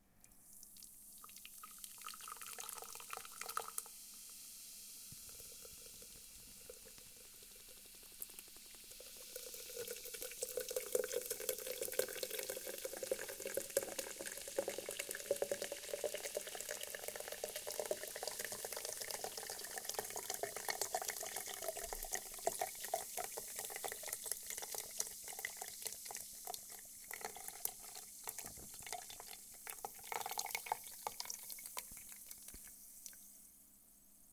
This is a sound of pouring a Large Monster Energy drink can full of Monster energy Drink into a Root Beer style clear glass mug that is completely empty. This is good especially for cinematics and audio drama and theater.